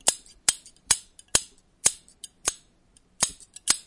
Poultry shears
Heavy iron scissors. Recorded with a Zoom H2n